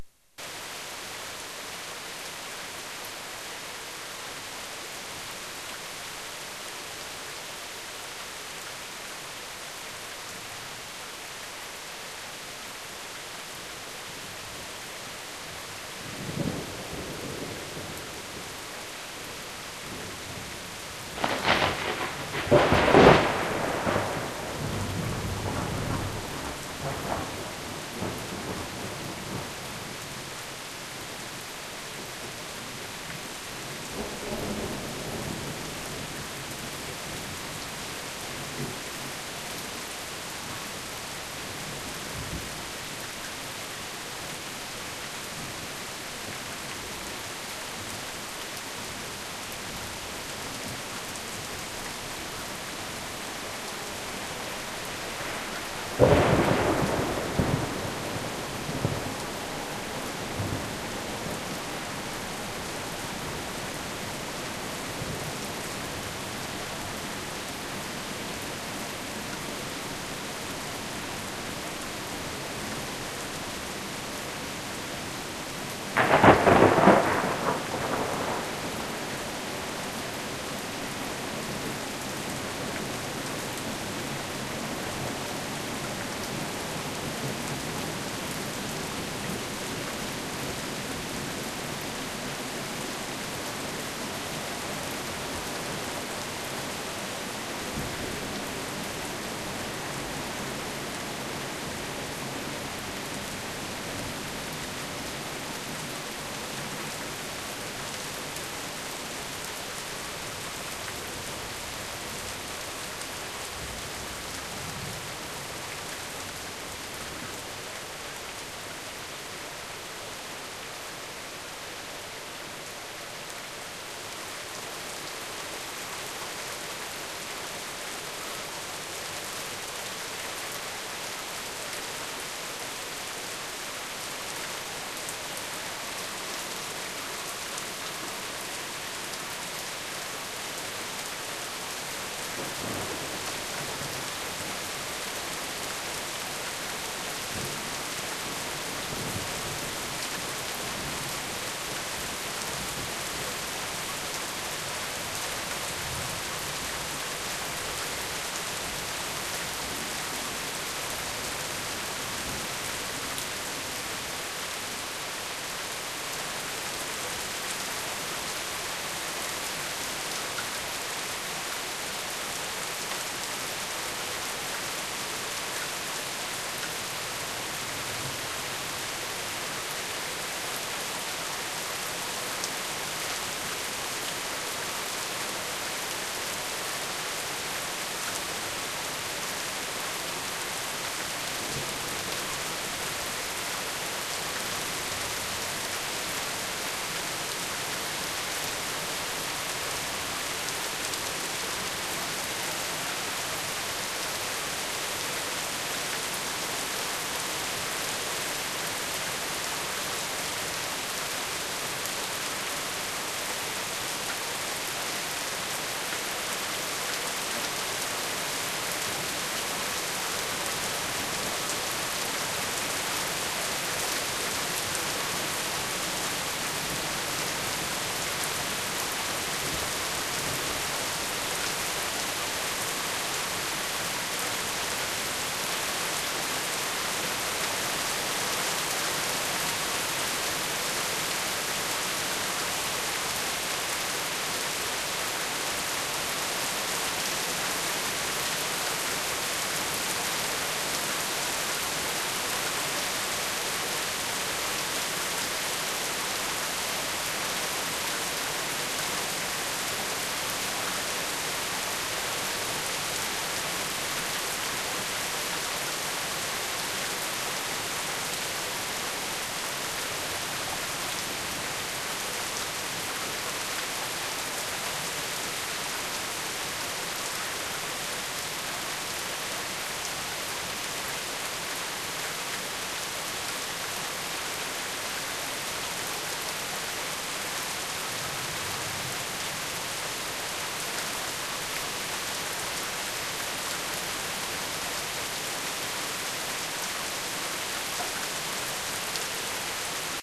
Heavy rain and thunder, recorded at the veranda of my house in a suburb of Cologne, Germany,June 1997, late afternoon. Stereo, Dat-recorder.